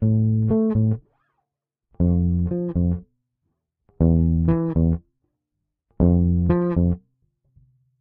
Dark loops 105 bass dry version 4 60 bpm
This sound can be combined with other sounds in the pack. Otherwise, it is well usable up to 60 bpm.
piano, bass, loop, 60, loops, dark, bpm, 60bpm